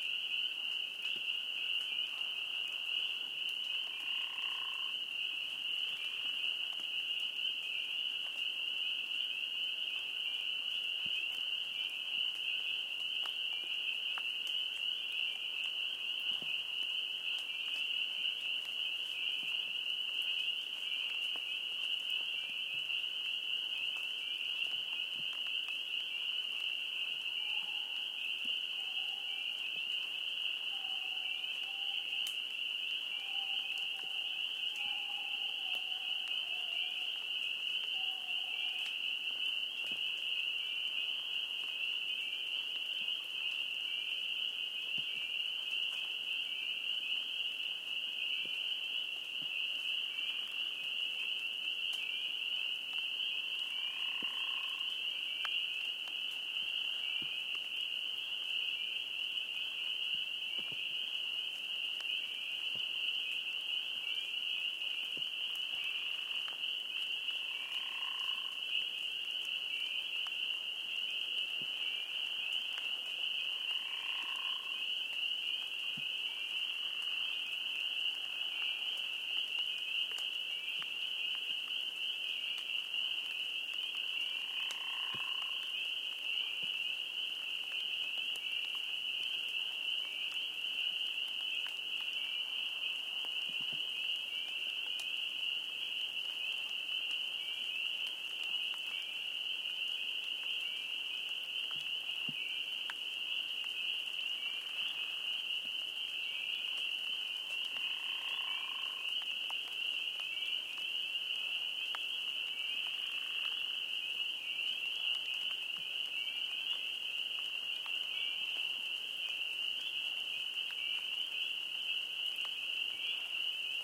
forest ambiance chepachet spring night 1
(1 of 2) Nighttime in rural western Rhode Island, USA, near Chepachet. Early spring. Chorus of various species of frogs, crickets (?). Light rain. In the distance, briefly, calls of some creature of the night: an owl or canine?
rain, springtime, crickets, rural, spring, rhode-island, night, new-england, frogs, forest